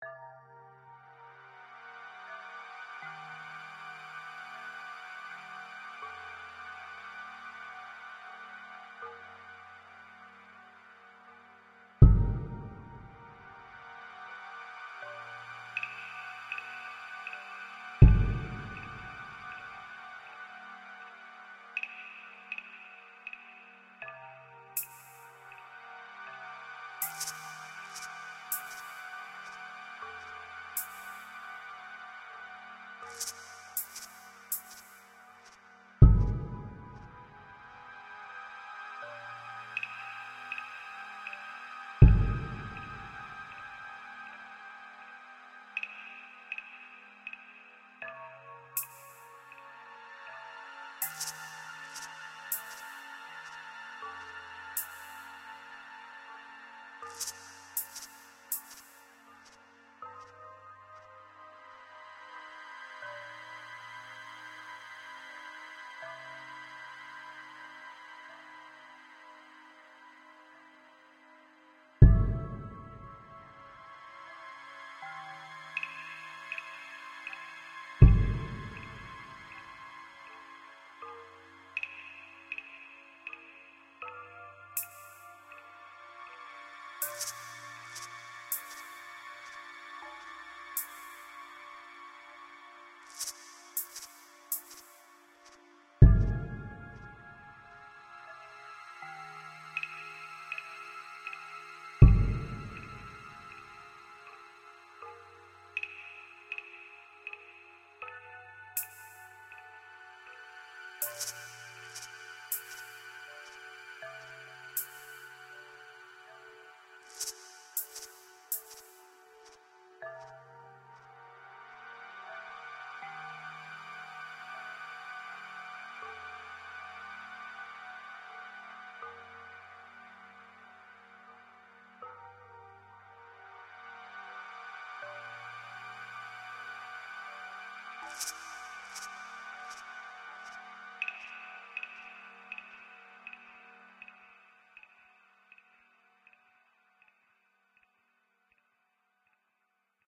run of the mill Suspense/Horror background music. Have fun with it!Made using FL Studio 11 using samples from MusicRadar's 1000 Sample Pack and internal synths/mixing plugins.
ambience, atmosphere, background, cinematic, horror, movie, music, suspense